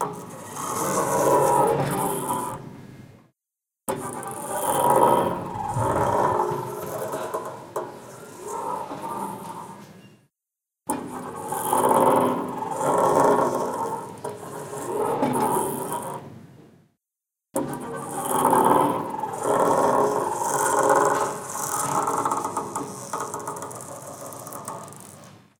Automatic glass door at an airport terminal opening and closing, noisy bearings.